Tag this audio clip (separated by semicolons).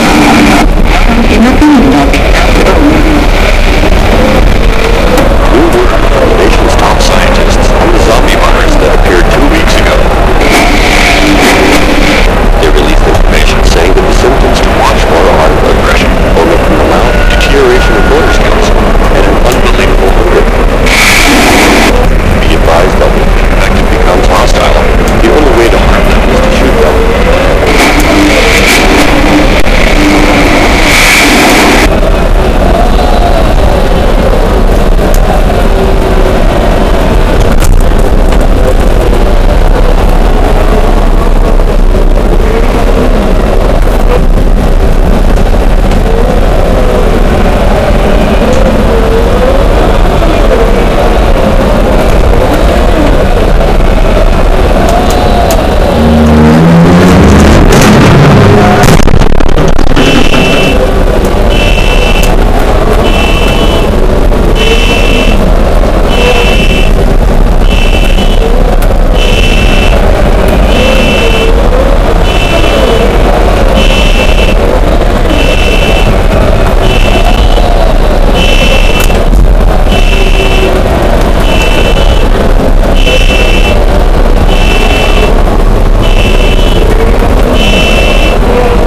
Trapped; Zombie-Horde; Horde; Radio; Zombies; Zombie-Invasion; Invasion; Zombie; Apocalypse